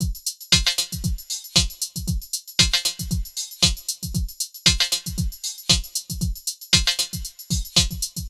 swinging drum loop made with Apple's flanger, matrix reverb and dynamics processors. made with Linplug RMIV in Logic 2003. Used by ADF on 'Hope' from the Tank Album. 2005